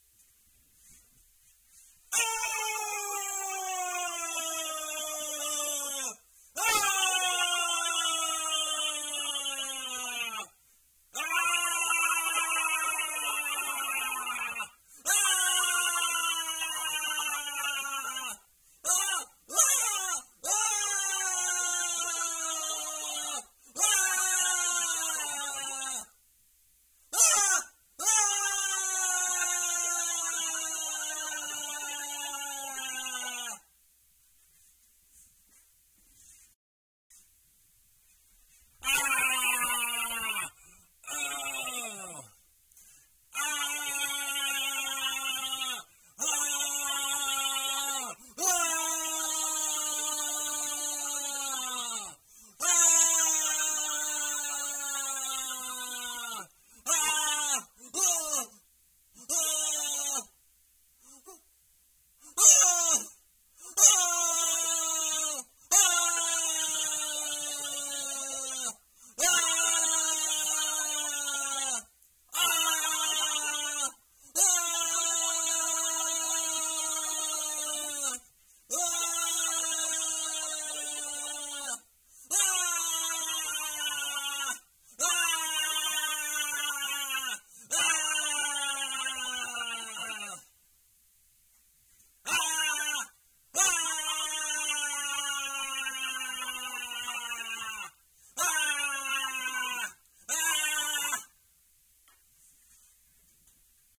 scream man
scream, human, ah, raw